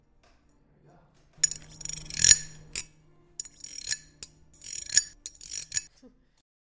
Forbes Project 1 1#06
I was actually scraping a pen against the outside of a glass cup, letting it bounce off the sculpted indents making a jagged sound.
ding, sound, MTC500-M002-s13fine, soundping